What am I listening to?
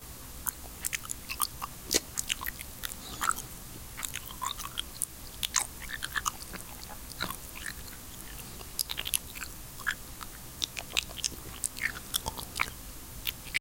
Sample of myself sloshing around some water into my AKG condenser mic